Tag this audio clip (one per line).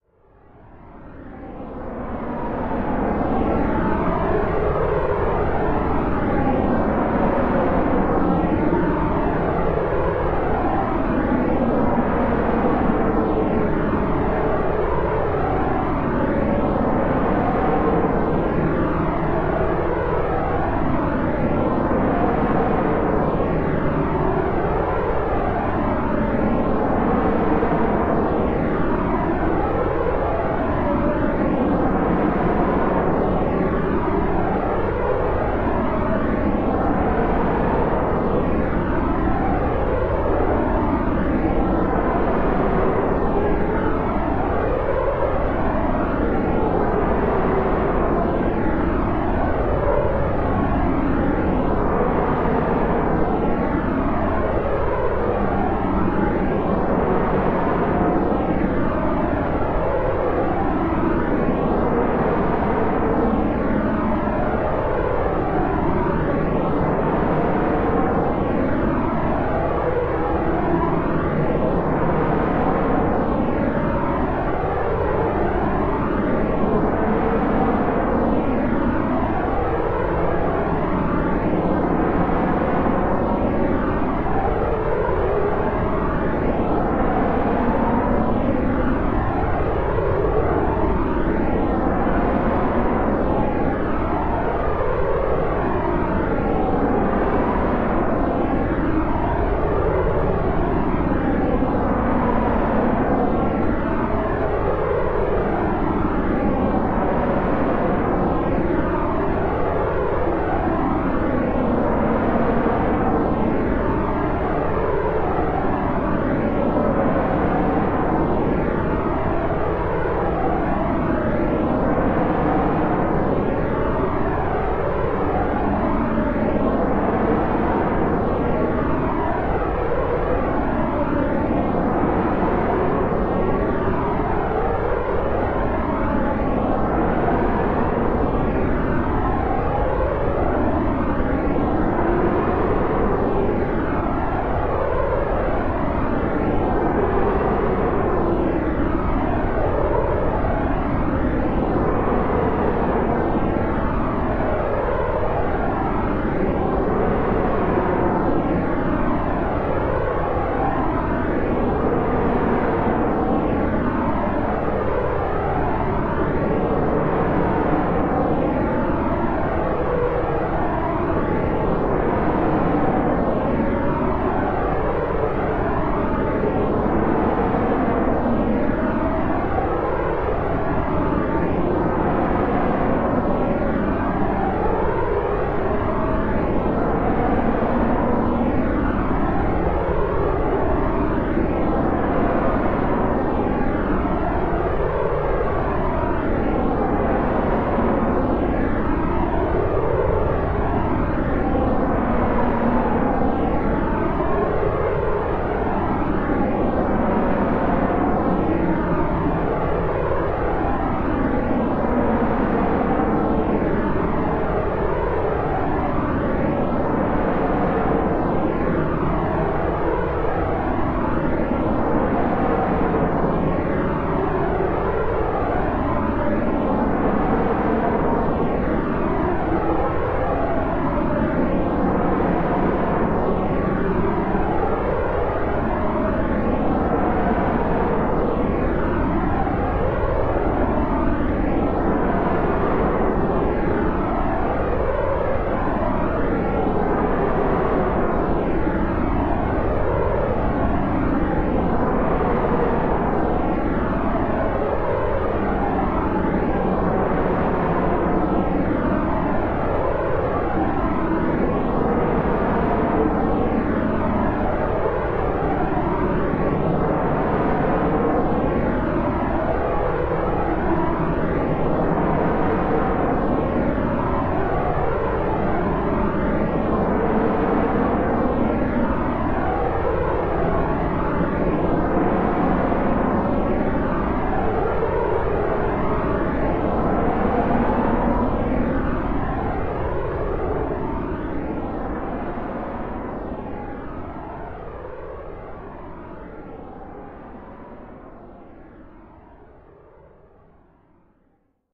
sky
aircraft
jet
foley
noise